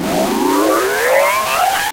Processed sound from phone sample pack edited with Cool Edit 96. Stretch effect applied then gliding pitchshift, echo, flanger and distortion reversed.
distortion, flanger, mangled, reverse, stretch